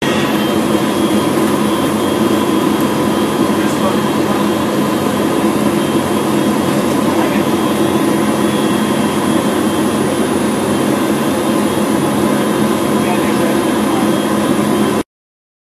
US Lab background
This is a recording of the background noise of the US lab on the International Space Station, recorded by Commander Chris Hadfield.
This is what normal life sounds like in space.
Find out more:
ambient, Astronaut, Chris, Cmdr, Col, Commander, Hadfield, International, ISS, noise, noises, sound, space, station